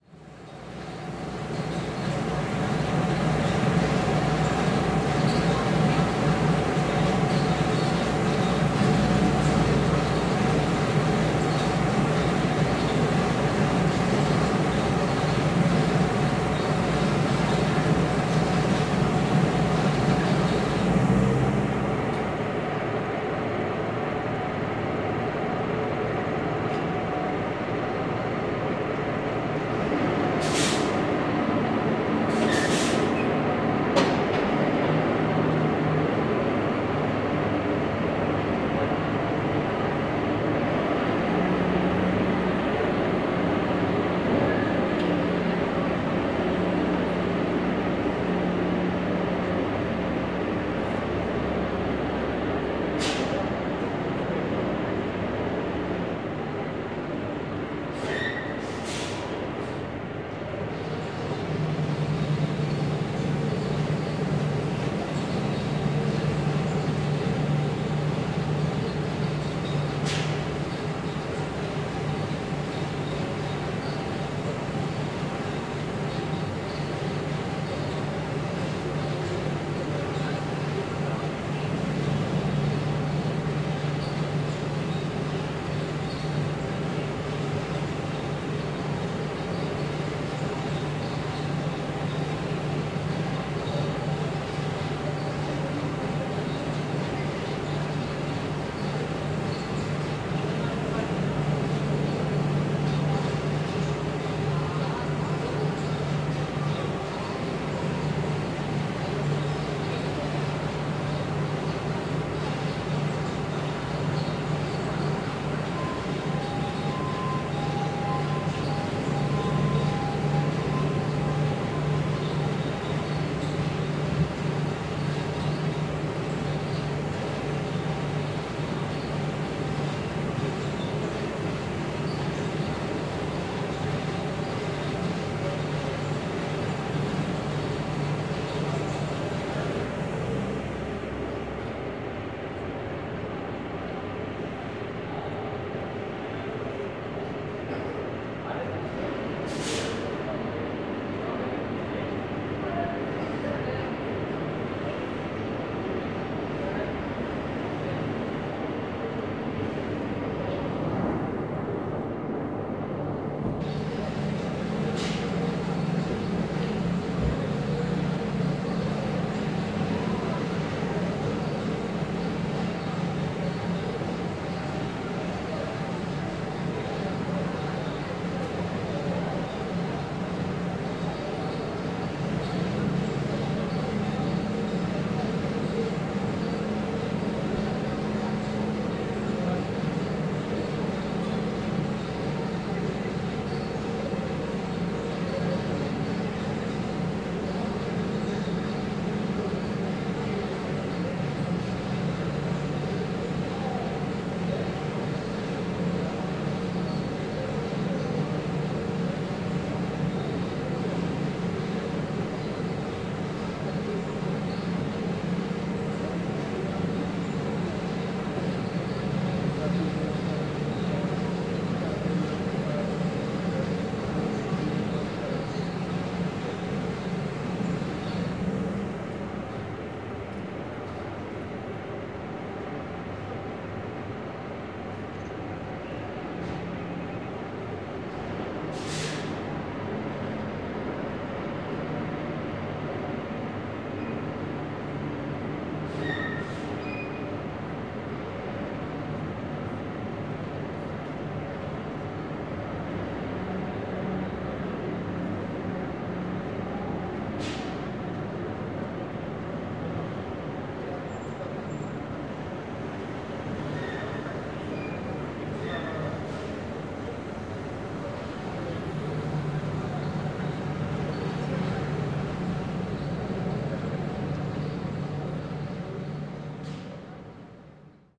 30.04.2018 podlewanie drzew wrocławska
30.04.2018: noise produced by the water-cart on Wrocławska street. Recorder Marantz PMD661MKII + shure vp88.
center, city, field-recording, noise, Poland, Poznan, pump, street, tractor, water, water-cart